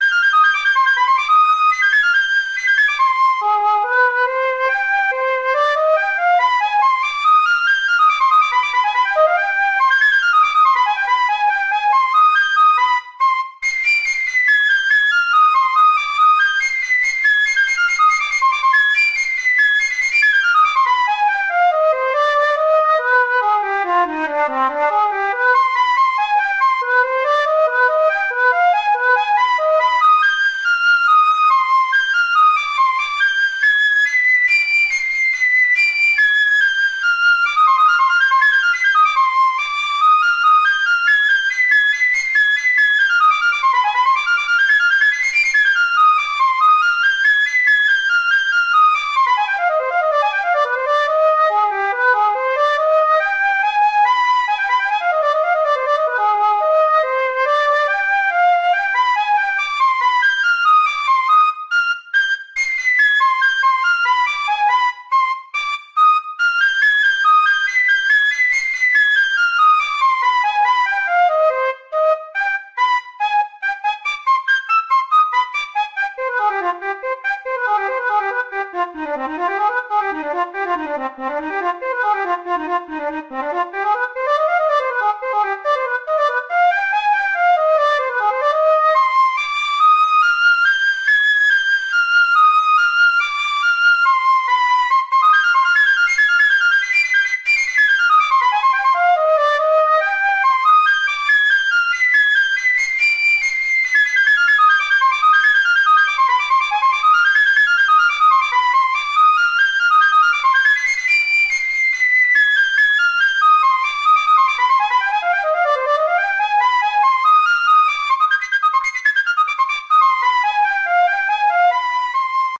Flute Szolo
This is a flute solo that I wrote for one of my songs, a psytrance song. About 2 minutes of more or less baroque style
baroque, flute, pedal, point, sampled, solo